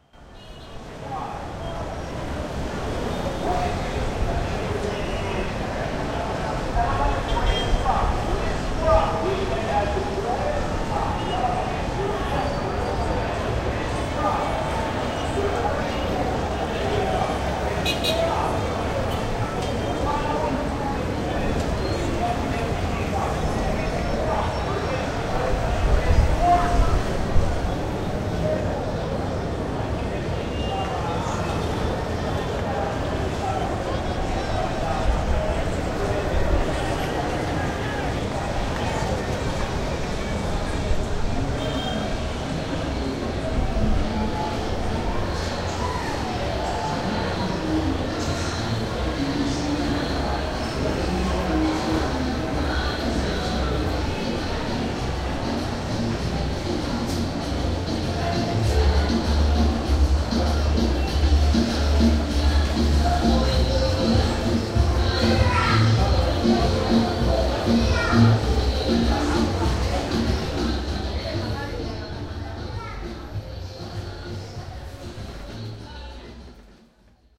Sound of Kampala
This is a recording from the 5th floor of a shopping centre in the centre of Kampala. I was on the balcony and then took a walk back inside to visit my friend Joy. Lots of vehicles, people and music. Audio captured on zoom h2.
uganda, africa, crowds, zoomh2, kampala, city